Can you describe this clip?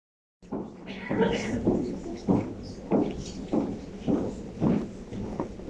An audio of steps on a wooden stage of an auditorium.